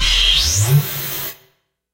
Sounds like a robot arm.
factory, industrial, machine, machinerey, mechanical, robot, robotic